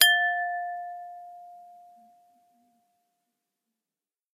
Glass Bowl 1
Small-sized Pyrex glass bowl struck once with a finger nail. Recorded with a 5th-gen iPod touch. Edited with Audacity.
chime, strike, bell, glass, ring, ding, ringing, pyrex, ping, bowl